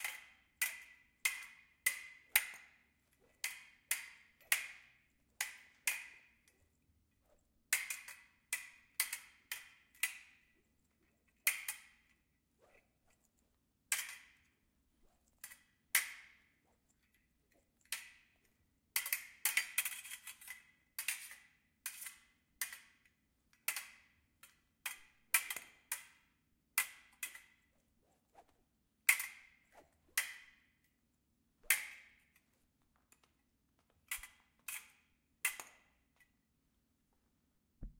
Fencing dueling blades (foils) clashing and swishing through the air.
metal, swords, OWI, duel, fencing, clash, foil, sport